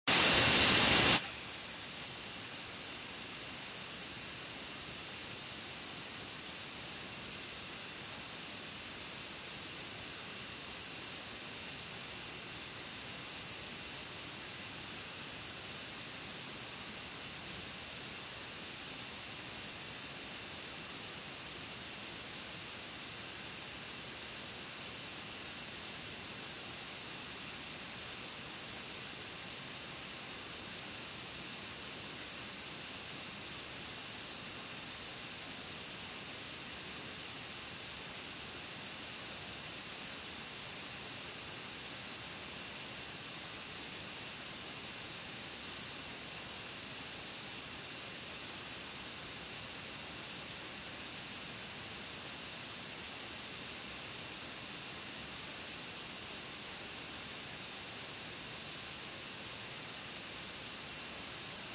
This is short recording of my laptop fan. Recorded with Nokia 5.1 Android phone with it's internal microphone using ASR-app.
hum, machine, fan, Laptop